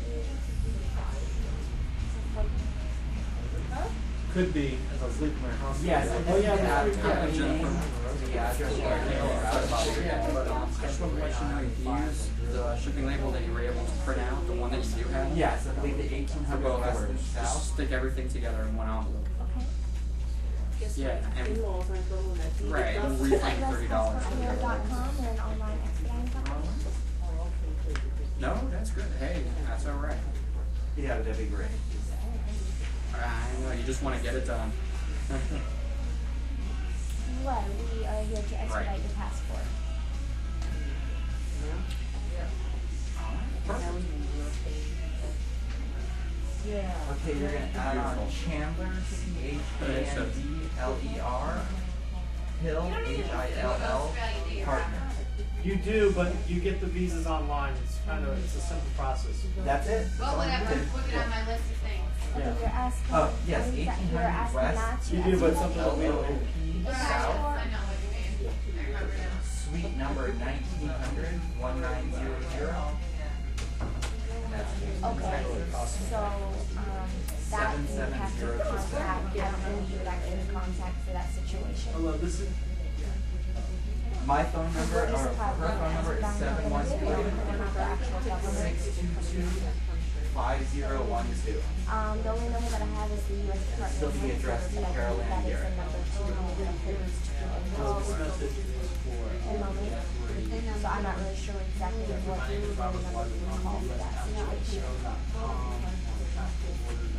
office ambience long4
Sounds of a small office recorded with Olympus DS-40 with Sony ECMDS70P.
office, field-recording